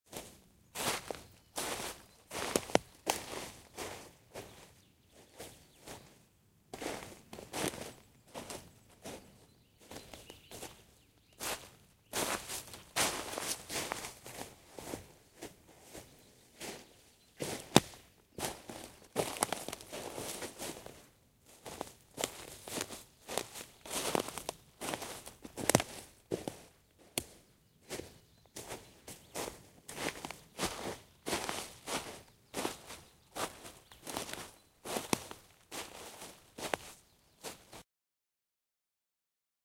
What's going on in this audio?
Walking through dry leaves in a forest. Going from left to right and back. Make it mono if you like it to use as Foley.
forest, rustle, steps, walk